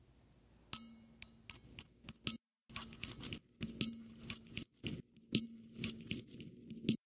Noise depicting scratching of a surface.
scratching, scratch, mus152